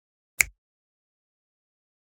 finger-snap-mono-03
10.24.16: A natural-sounding stereo composition a snap with one hand. Part of my 'snaps' pack.